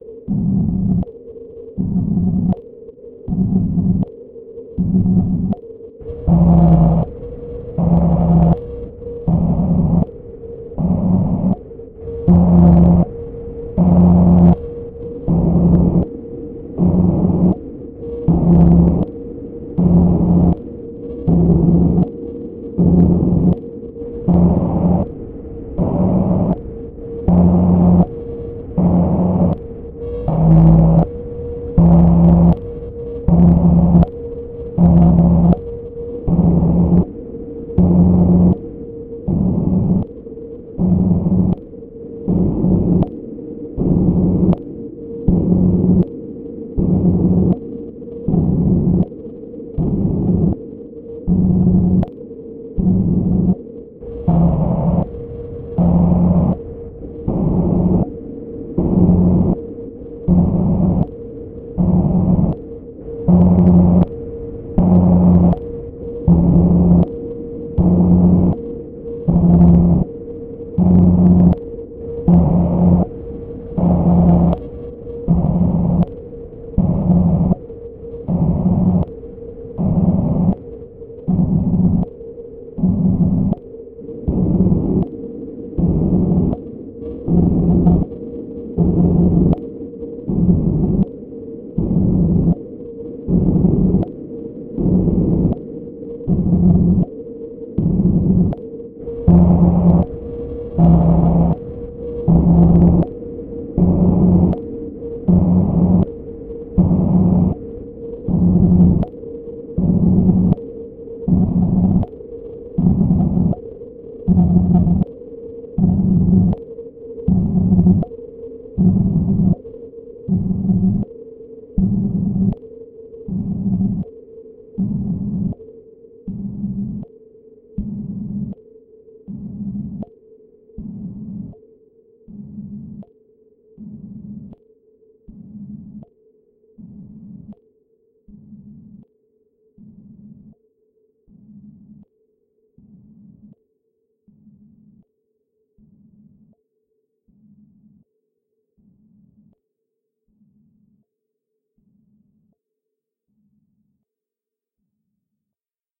Noise bursts created with a slow stepping random LFO with some delay and distortion. Created with RGC Z3TA+ VSTi within Cubase 5. The name of the key played on the keyboard is going from C1 till C6 and is in the name of the file.